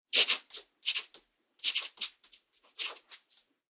Pencil Writing 1 4
Real, Pencil, Foley, Writing, Table, Hit, Design, Desk